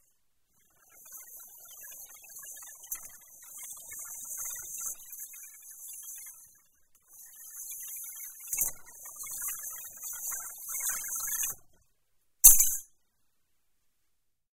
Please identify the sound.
Metal,Pipes,Scratch,Clank,Loud,Abrasive,Crash,Great,Hall
Part of a series of various sounds recorded in a college building for a school project. Recorded with a Shure VP88 stereo mic into a Sony PCM-m10 field recorder unit.
field-recording, school